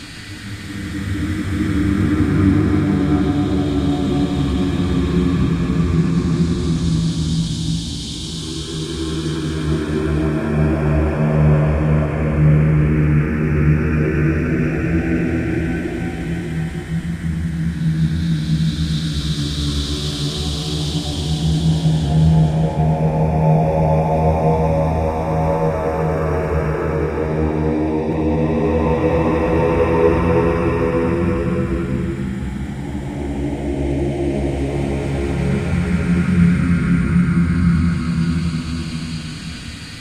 Ghosts from the death spheres coming for a visit. Layered paulstretched voice samples of my voice.
Recorded with Zoom H2. Edited with Audacity.